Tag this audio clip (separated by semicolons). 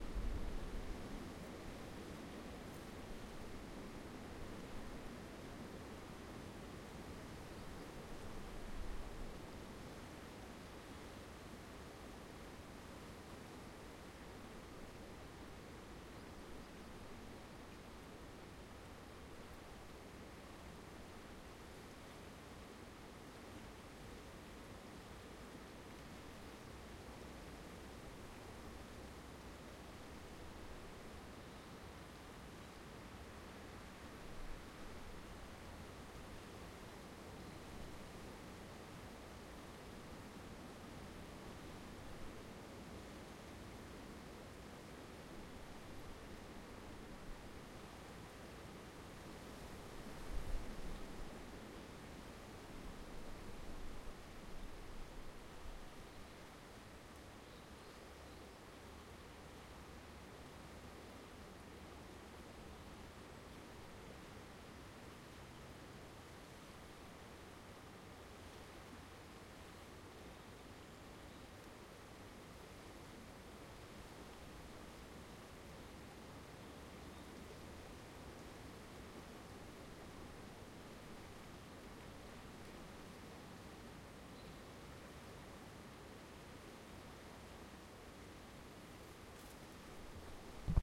Day; field-recording; Trees; Wind